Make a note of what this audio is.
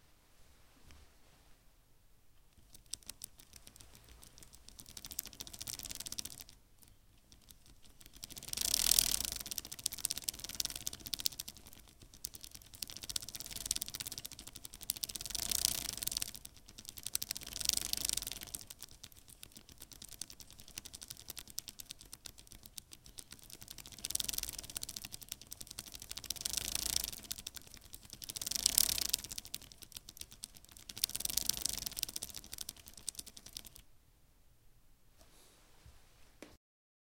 bike-chain
Close-miked recording of a bicycle chain. Recorded in mono with an AKG C1000s.